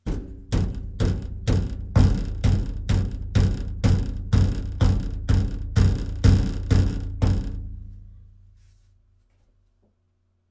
Rhythmic beating on the outside of a washing machine which is a great way to sound like a mechanical device is malfunctioning. Lots of samples in this set with different rhythms, intensities, and speeds. Beating but more bass and not as much rattling.
Recorded on a Yeti Blue microphone against a Frigidaire Affinity front-loading washing machine.